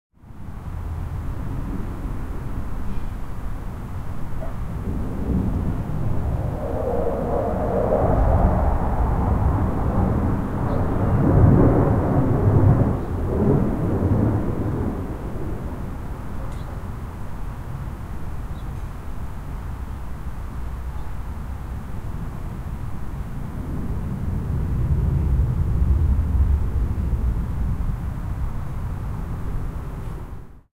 Blue Angels 2
F/A-18 Hornets practicing maneuvers in Seattle Washington.
urban-soundscape, noise, whashington, urban, FA-18-Hornet, exhaust, engine, blueangles, field-recording, US-navy, jet-engine, jets, air-plane, jet, seattle, blue-angles